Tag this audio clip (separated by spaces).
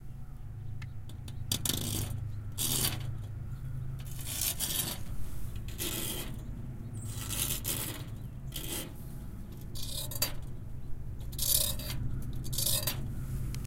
field-recording metal metallic rust scrape